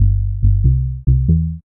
I made this bass melody with fruity loops.
loop, bass